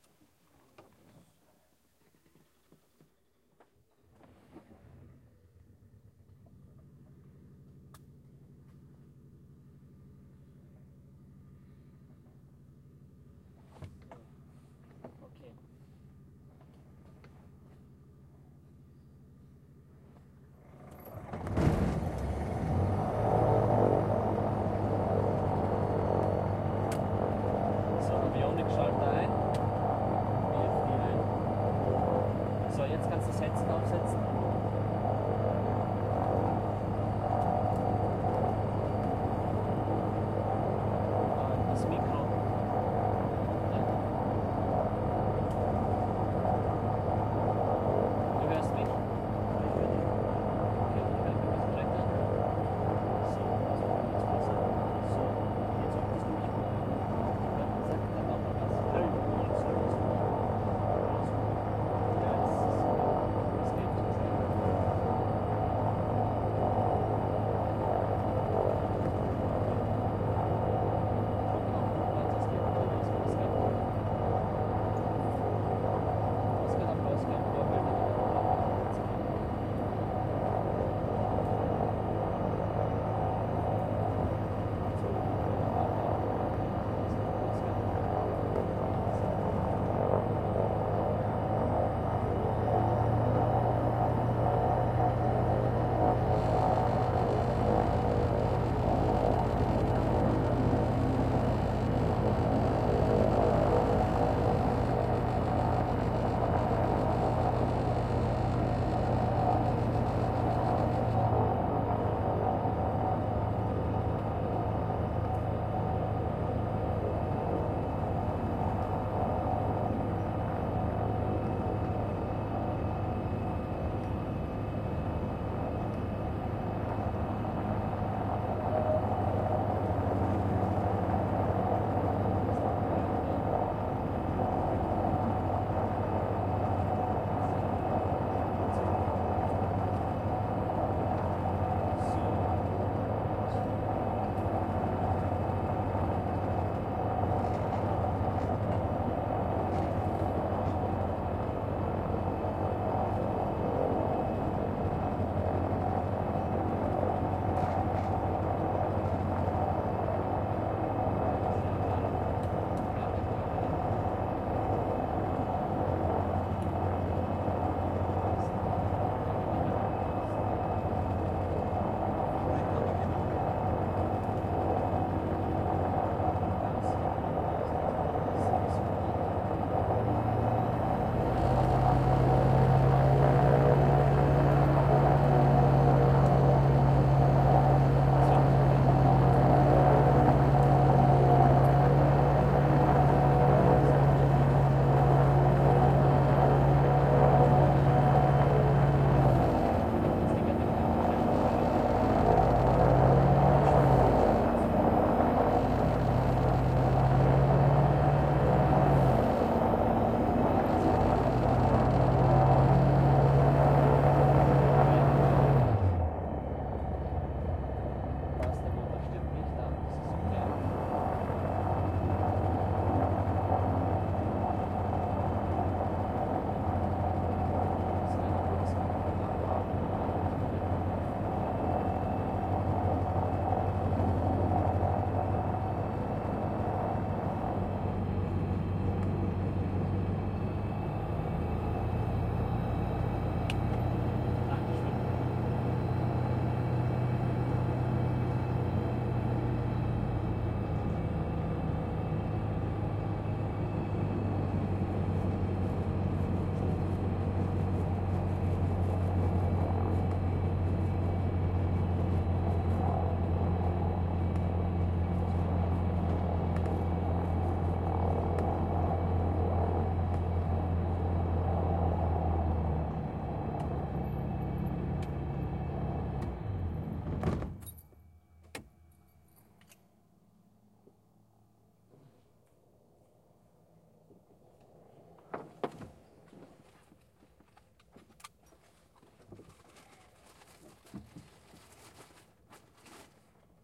Small Aircraft Katana DV20 - inside
Inside Light Aircraft. Taking seats, starting engine, short flight, engine off. Recorded with Tascam DR-40
Aircraft, Engine, Flight, Rotax, Sound